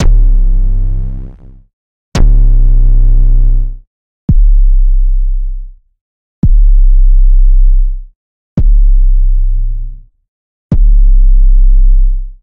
Square Kick in C [Mono]
I had used FL Studio 11's 3xOsc to make these. In the piano roll I used the note slider and note properties (like Cutoff, velocity, and Resonance) to modify each body of the kicks. They're all in C so there shouldn't be any problems in throwing it into a sampler and using it. BE SURE to msg me in any song you use these in. :D
lil-pump, malone, bass, detune, xxxtension, sub-bass, 808, mafia, sample, kick, heavy, post, post-malone, hard, sub, 808-kick, trap, free